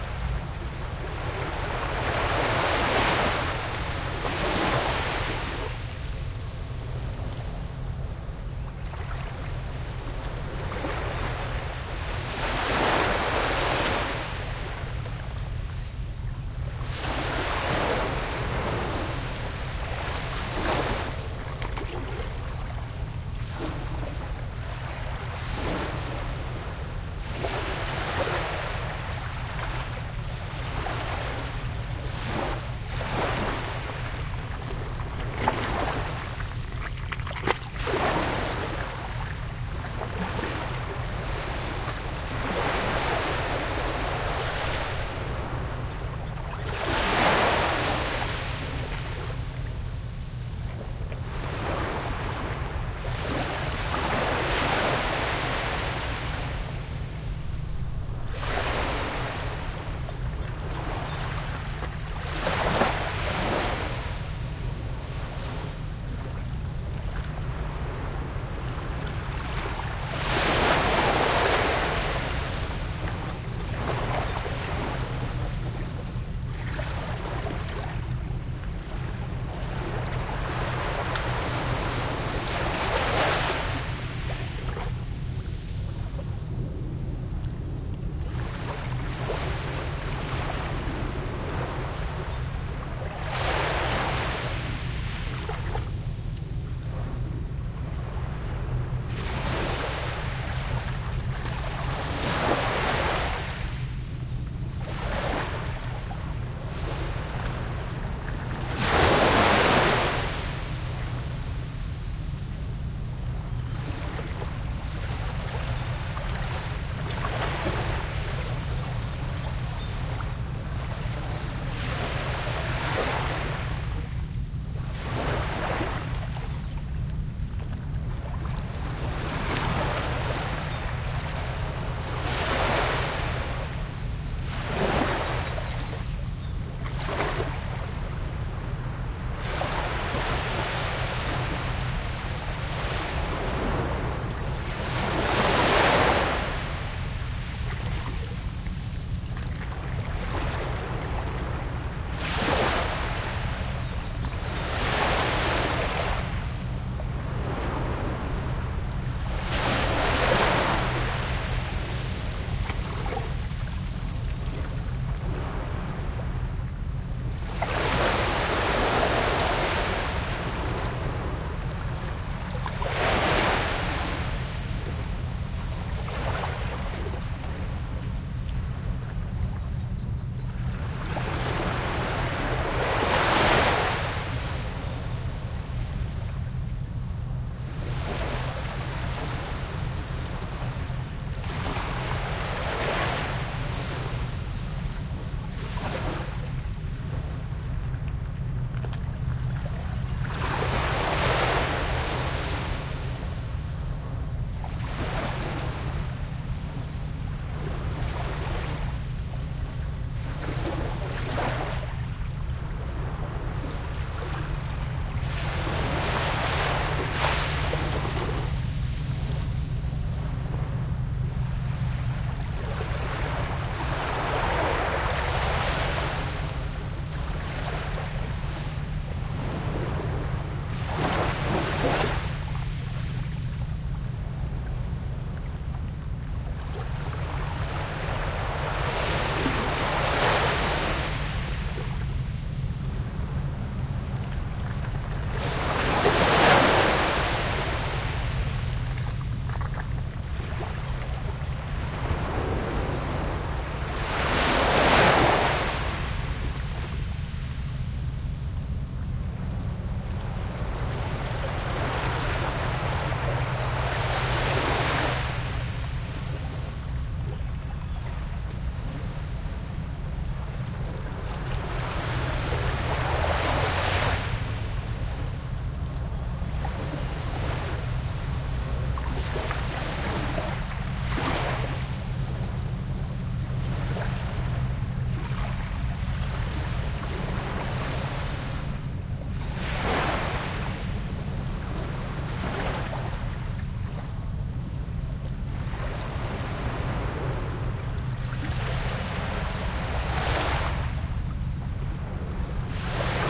Fishing Pier 01
24_48-Stereo-Recording at a local fishing pier. Unfortunately there were some boats on the water and cars on the road; so you can hear both.
ambiance, ambience, atmosphere, beach, bird, dock, field-recording, fish, nature, ocean, pier, water, waves